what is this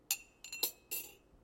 Ceramic coffee cup and metal spoon
coffee, cup, spoon